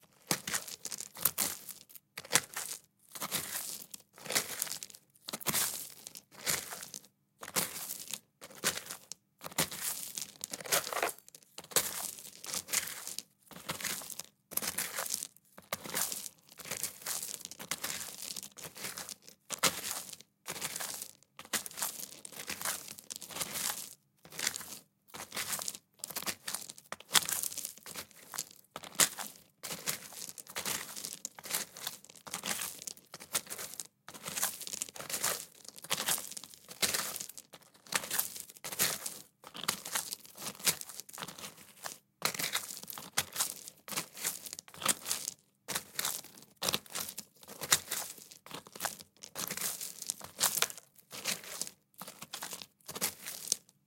Footsteps Walking On Gravel Stones Slow Pace
Loose, Snow, Outdoors, Concrete, Gravel, Sneakers, Path, Trainers, slow-speed, Sand, Running, Clothing, Rock, Footsteps, Road, Stone, Pavement, Man, Asphalt, Beach, Shoes, Boots, slow-pace, Trousers, Fast, Stones